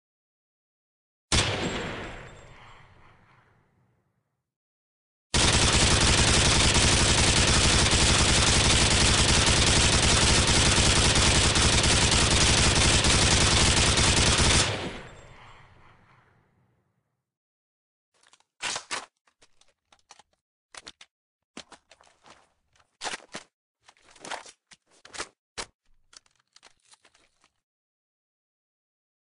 RPD gun shots
Recording of RPD being shot multiple times.
gun-shots, mp-3, rp-d, weapons-bullets